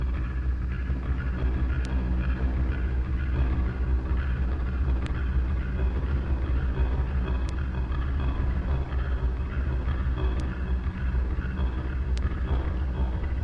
An old diesel engine that serves as power sauce for driving a water pump.